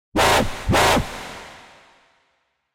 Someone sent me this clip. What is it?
DnB&Dubstep 003
DnB & Dubstep Samples
drumstep, bass, dubstep, drumandbass, dnb